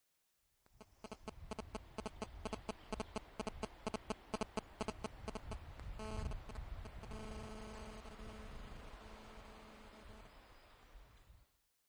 signals tel
Phone signal interrupts recording.
forest, interruption, mobile, phone, signal, technology